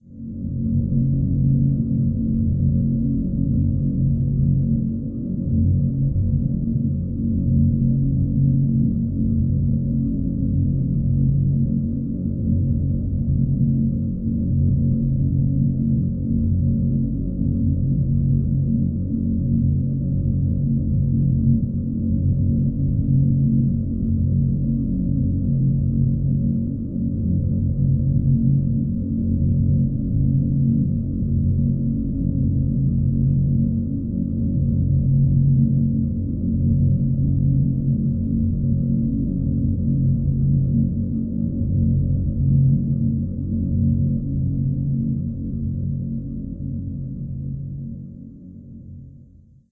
Deep Cinematic Rumble Stereo
A very dark, cinematic ambient rumble with a deep bass tone hidden in the background
HEY!
suspense, 1min, spooky, background-sound, terror, atmosphere, cinema, dark, ambience, thrill, anxious, film, cinematic, rumble, tone, background, scary, sinister, ambient, bass, dramatic, roomtone, deep, drama, haunted, drone, stereo, terrifying, creepy